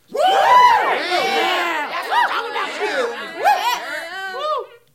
Small audience yelling "woo!" and things like that